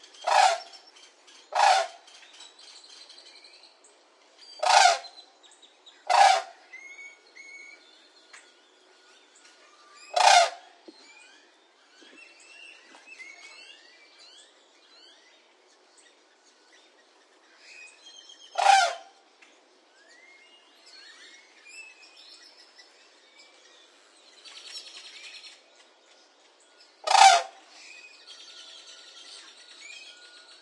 saz white naped crane
Loud calls from a White-naped Crane. Some grackles in the background.